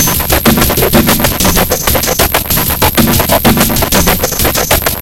Roland In
ambient, bent, circuit, drums, roland